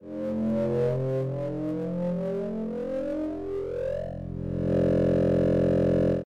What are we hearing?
Retro Random Sound 05
Futuristic engine starting up.
Thank you for the effort.
cool, computer, sample, original, retro, 8bit, school, sound, effect, tune, game, old